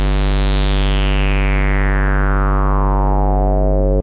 Multisamples created with Subsynth.
multisample, square, synth